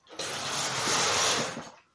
Lego Swish 02
Swishing my hand through lego on the floor
lego, floor, scraping, Spread